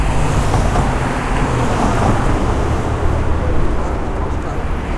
City Crossing
public, noise, crossing, city, traffic, field-recording, nyc, new-york, walk-way, cars